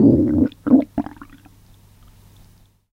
Using an AKG C1000s I recorded my ex's stomach after she'd taken some prescription pills and they'd started making noises in her stomach! Bit weird, but maybe it's just what someone's looking for!